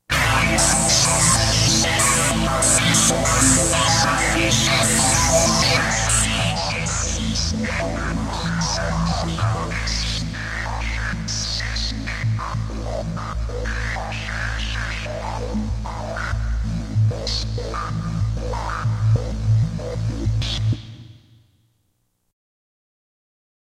Filter Dance
Another spacey sound from my Roland GR-33 Guitar Synth.